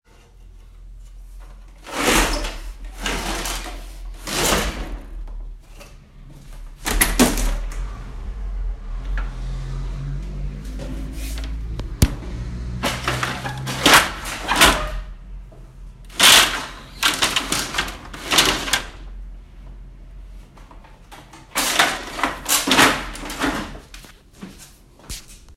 Me opening and closing wooden blinds. Recorded with an iPhone mic.